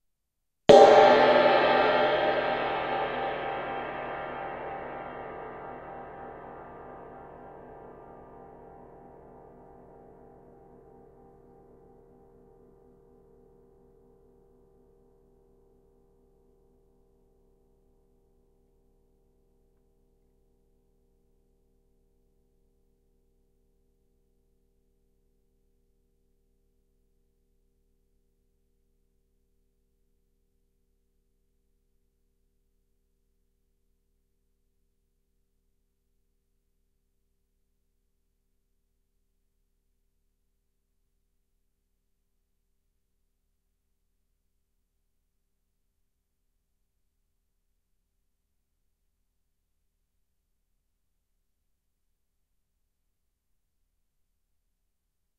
Large Cymbal - Felt
A stereo recording of a 54cm diam bronze machined cymbal struck with a felted striker..Rode NT4 > Fel battery Pre-amp > Zoom H2 line-in.
xy, stereo, zildjian